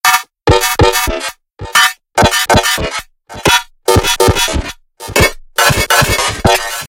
Non-Stop